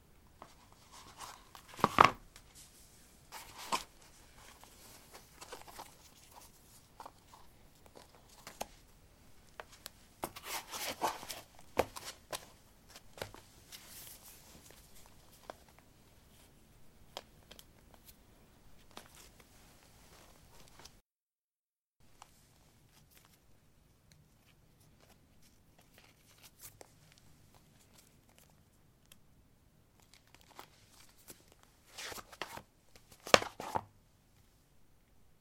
paving 05d summershoes onoff
Putting trekking boots on/off on pavement. Recorded with a ZOOM H2 in a basement of a house: a wooden container filled with earth onto which three larger paving slabs were placed. Normalized with Audacity.
step, footstep, steps